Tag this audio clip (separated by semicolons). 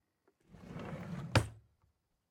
cabinet; close; cutlery; drawer; dresser; kitchen; metalic; opening; sliding